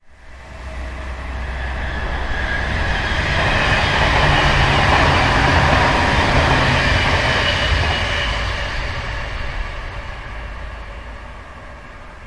Field recording of an electric train passing at high speed.
Recorded with Zoom H1